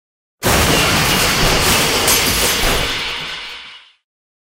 Large Crash
A large car crash sound I made with sagetyrtle's crash sample, rocksavage's crash & glass, and connum's bottle breaks.
crash, large, crack, smash, boom, car, accident